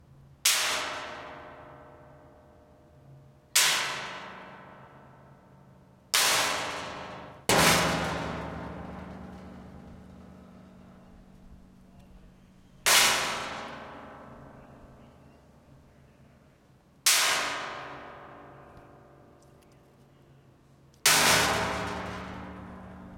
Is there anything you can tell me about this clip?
Harsh Metal Clang
Throwing a stick against a metal chair can create an interesting noise
crash, good-for-horror-movies-proabbly, loud, metal, metallic, RIP-headphone-users